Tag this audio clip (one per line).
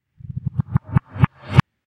sound
Reverse
ball